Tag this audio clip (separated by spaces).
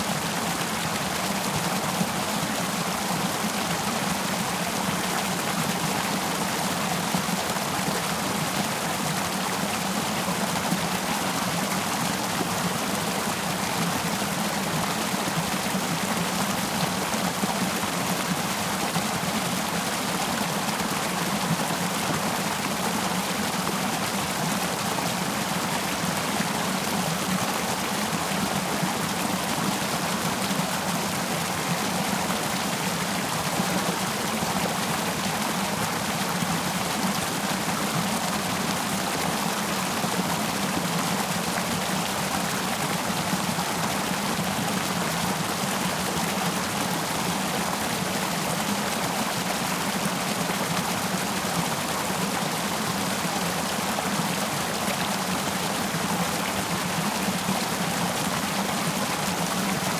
Stream River Creek Background Waterfall Splash Flow Dam Nature Water Ambience Mortar